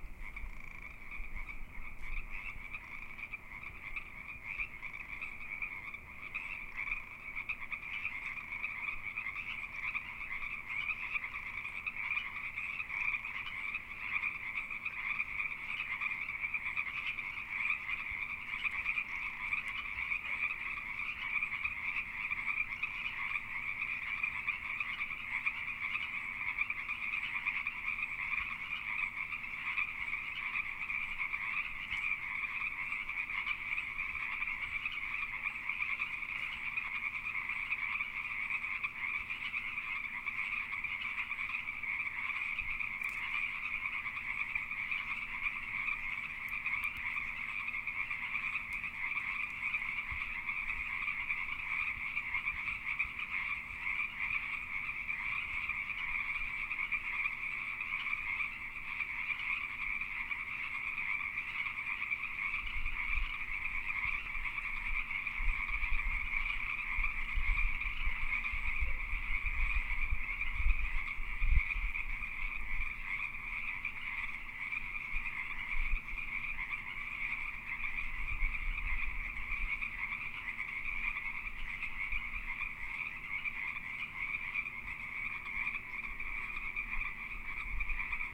Pacific Treefrogs in Mating Season
Pacific tree frogs at night during mating season in British Columbia.
Slight wind sounds and occasional noises made by a black lab readjusting her feet on the concrete :-).
Recording is mostly to the left but sometimes shifts a few degrees to the right, and the frog's build from only a few to dozens over the duration.
Captured across the street from a water filled culvert in the Southern Gulf Islands on an Olympus LS7 portable digital recorder.
animals, British-Columbia, California, croak, field-recording, frog, LS7, mating, nature, Northern-california, Oregon, pacific-chorus-frog, pacific-northwest, pacific-tree-frog, pond, Pseudacris-regilla, ribbit, spring, tree, treefrog, Washington, west-coast